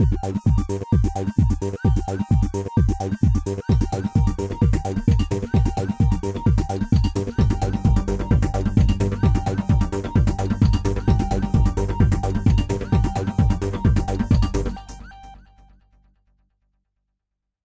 Robotic News Report
catchy, robotic, space, robot, warp, news